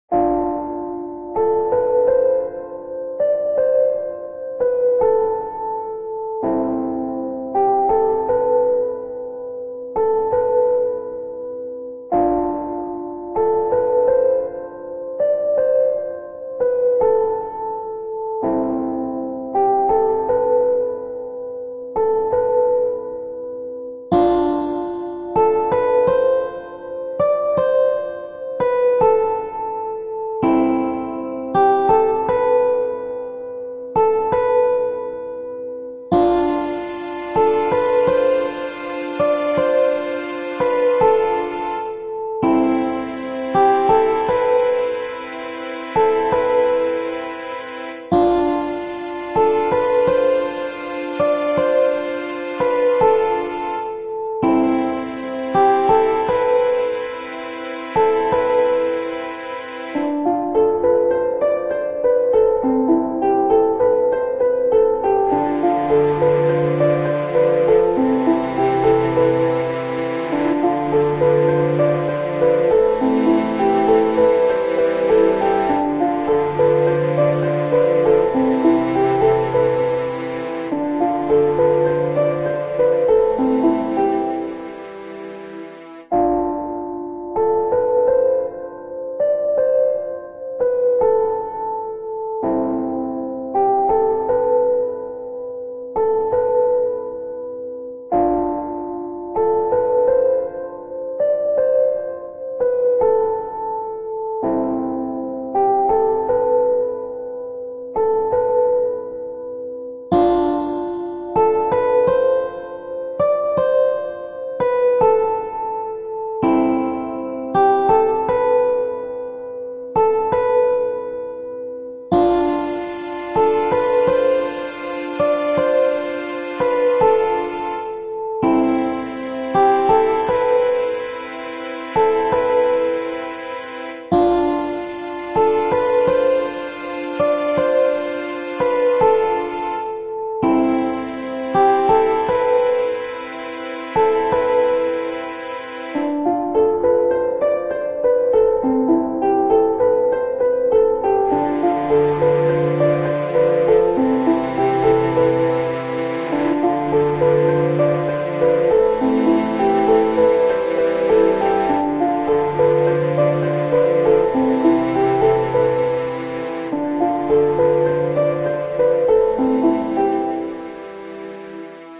Twlight Piano loop
film, harmony, music, sleep, atmosphere, piano-loop, song, movie, cinematic, forest, sample, Piano, atmospheric, moon, dramatic, loop, midnight, low-fi, serenity, orchestra, fairy, cello, peace, suspense, video-game, dark, moonlight, strings, fantasy, ambient